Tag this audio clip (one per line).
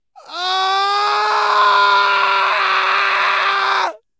emotional
weep
distress
wailing
agony
loud
heartbreak
voice
sadness
anguish
cry
cries
heartache
screech
howling
squawk
acting
human
scream
vocal
yell
shout
male
squall
ululate
grief
sorrow
pain
clamor